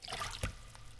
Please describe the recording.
medium splash1
splashing noise, with reverb
splash, splashing, water